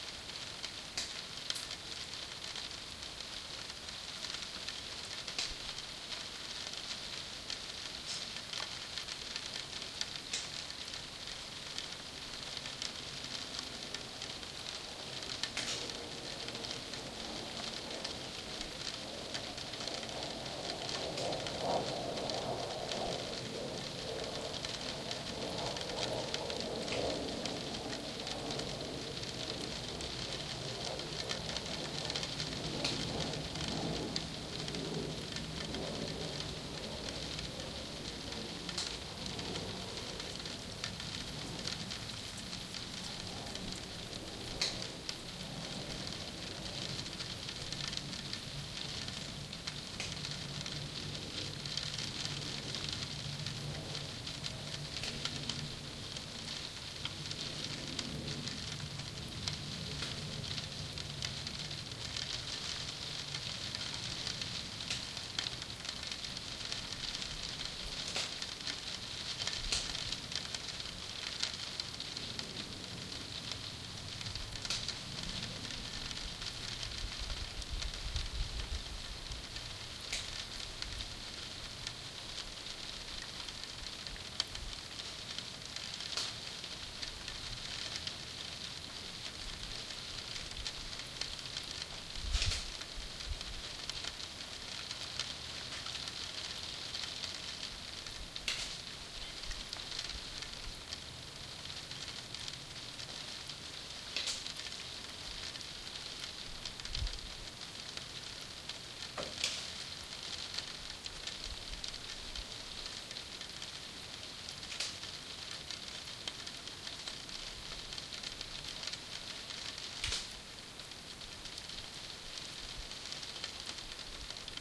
The sound of rain coming down steadily on a shabby lean-to between two terraced houses, with regular drips through the cracked glass onto the tiled floor below and an airplane passing overhead.